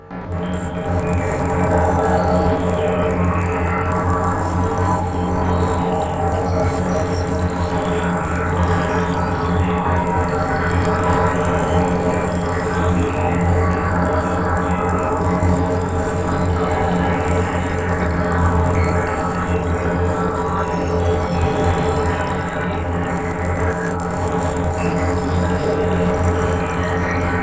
AlwaysWatching Soundscrape
Um i forgot prolly sum weird subtle noisy thing
soundscape, watching, always